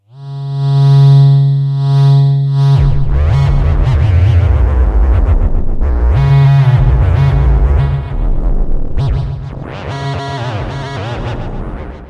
thereminmidi3echo
Virtual theremin sounds created with mousing freeware using the MIDI option and the GS wavetable synth in my PC recorded with Cooledit96. There was a limited range and it took some repeated attempts to get the sound to start. Third voice option with echo. Greg Anderstein theme.
free, mousing, sample, sound, theremin